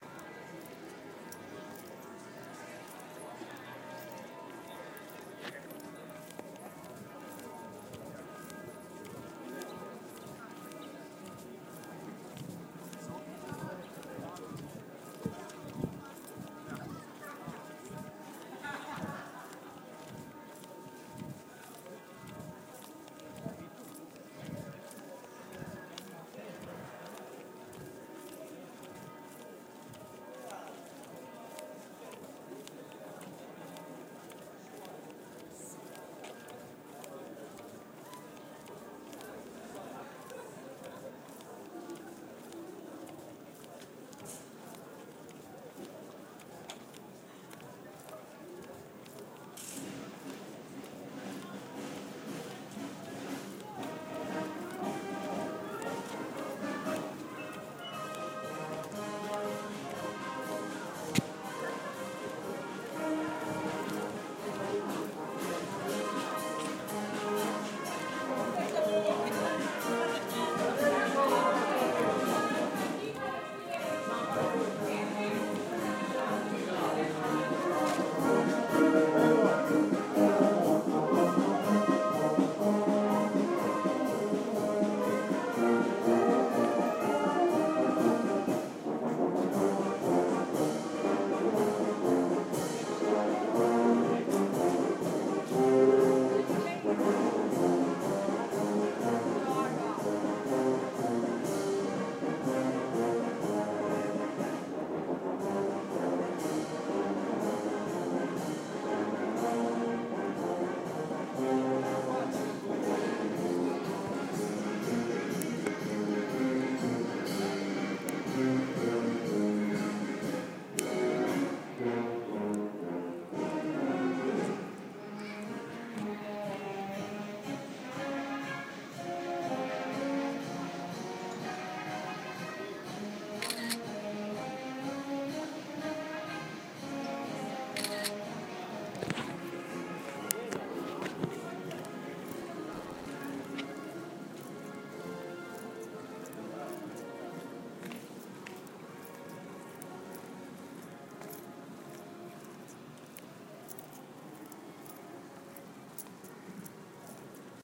ljubljana bytheriver
I was walking by the Ljubljanica river, near the Butcher's bridge, in Ljubljana when I heard a band playing. They were in a boat going down the river. I approached to see and hear better.
walking, marching-band, river, ljubljana, field-recording